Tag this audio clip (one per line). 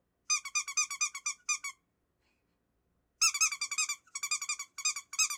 foley
squeak
squeaky-toy